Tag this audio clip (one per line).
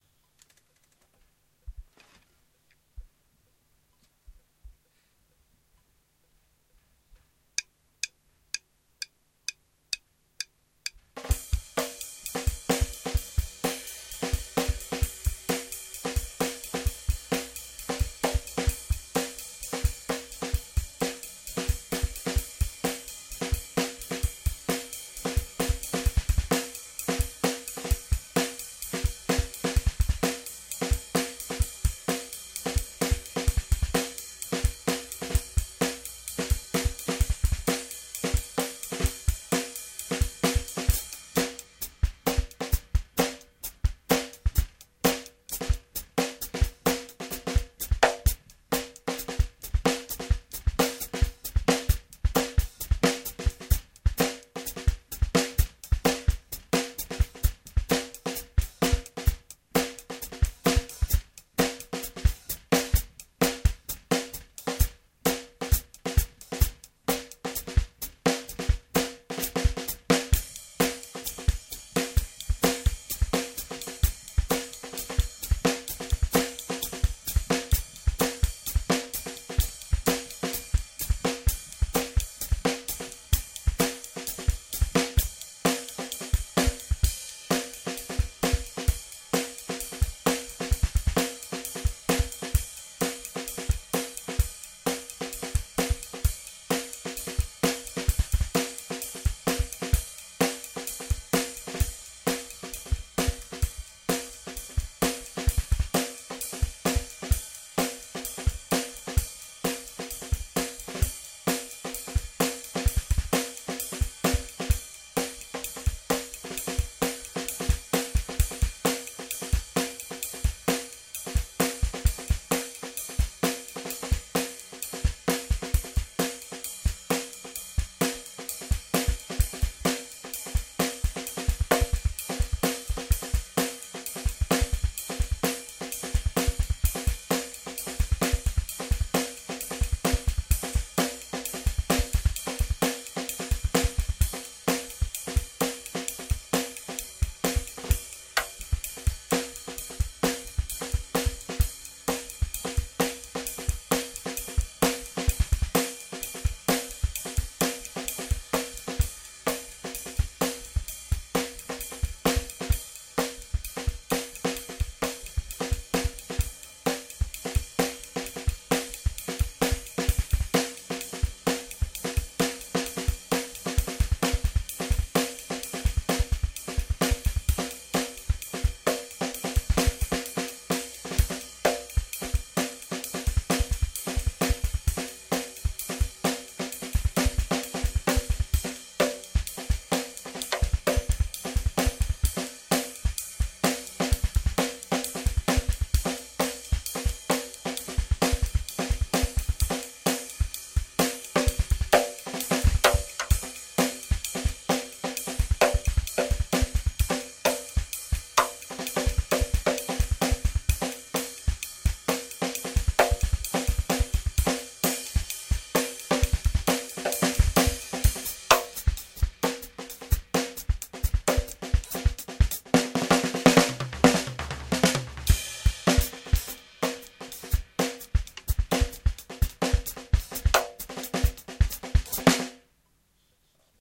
ace
bournemouth
download
drum
free
funk
jazz
london
manikin
producer
robot
samples
shark
space
time